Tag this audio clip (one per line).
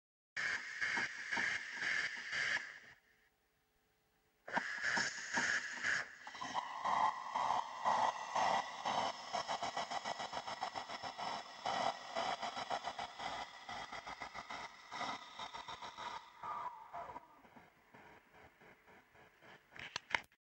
water
field-recording
Heart
Coffee
Steam
wave